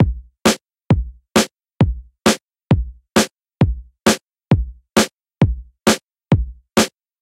133-old-dmachine-straight
drum machine styled loop 133bpm
drummachine, drum